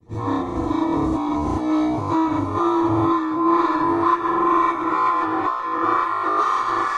Digital Warp 02
We all need 8 bit samples!
samples, warp, bit